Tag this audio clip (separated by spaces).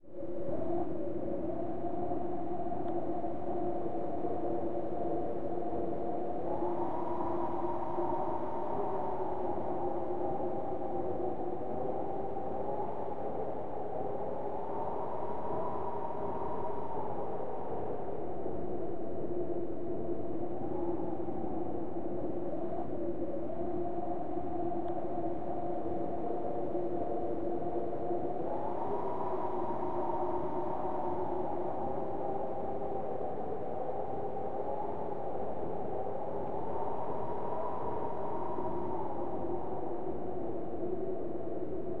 synth wind